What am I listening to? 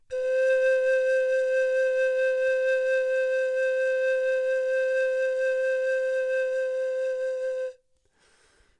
long tone vibrato pan pipe C2
c2, pan